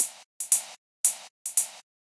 hi hat loop
hat, hi, loop